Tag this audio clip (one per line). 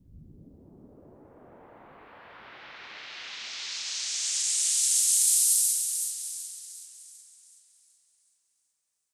Sweep
Raiser
Build-Up-Noise